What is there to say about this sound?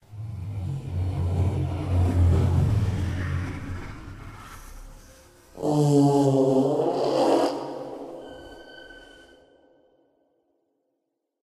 Ambient alien sound 03
Simulated ambient alien sound created by processing field recordings in various software.